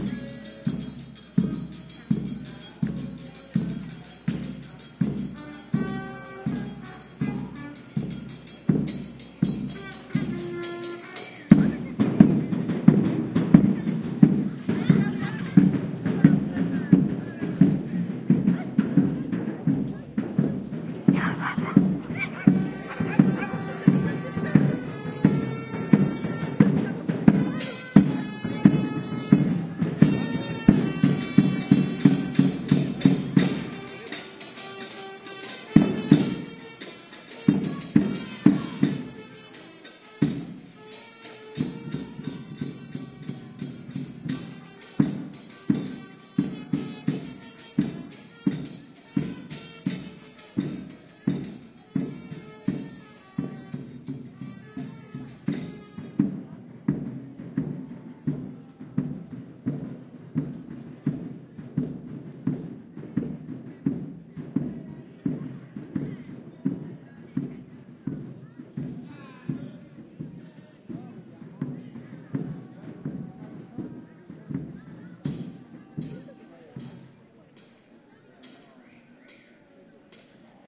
20160302 16.ushuaia.street
Street musicians play (trumpet, percussion) in Ushuaia (Tierra del Fuego, Argentina). Soundman OKM capsules into FEL Microphone Amplifier BMA2, PCM-M10 recorder.
ambiance, Argentina, drum, field-recording, music, percussion, street, Ushuaia